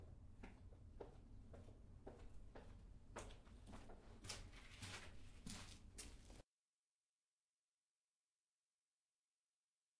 Footsteps going up a ladder inside of Castillo San Cristobal, in San Juan, Porto Rico.